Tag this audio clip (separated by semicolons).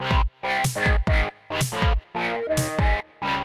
tekno; drum; bass; techno; DNB